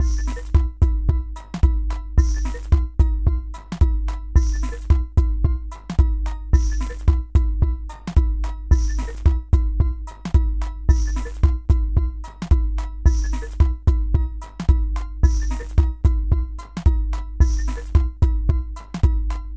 Flowers absurd Beat 2
An extremely peculiar beat I made in Hydrogen drumstation. Detuned and randomly-pitched claves and cowbells behind a minimalistic beat. A surreal beat.